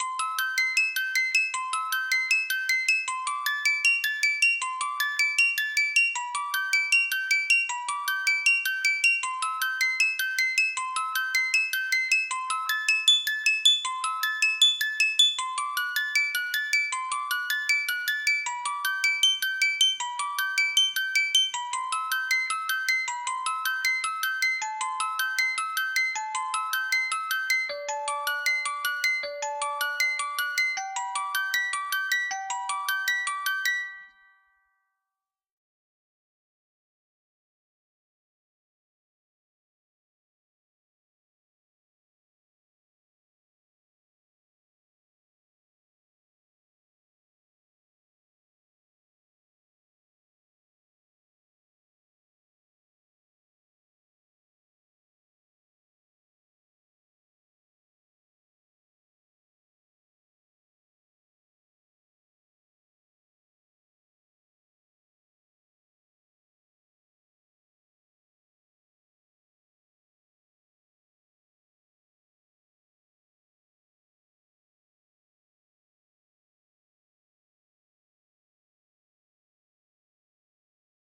Music Box Playing Prelude in C
classical, musicbox, box, antique, jingle, musical, music-box, melancholic, mechanism, music, wind-up, sound-museum, musical-box, old, mechanical, mechanical-instrument, historical, hand-operated, melancholy, instrumental, metallic